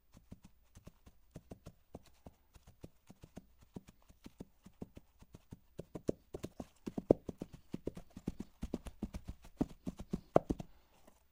Hooves, Hard Muddy Surface / Layer 02
Microphone - Neumann U87 / Preamp - D&R / AD - MOTU
Coconut shells on a muddy, hard surface.
To be used as a part of a layer.
Cow, Hoof